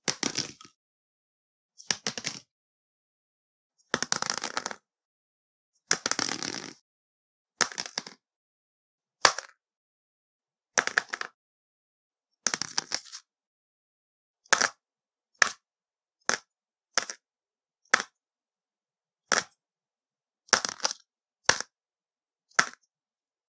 Item Drop Plastic 004
An earbud canister drop on a cardboard laid on the floor.
item, floor, impact, drop, fall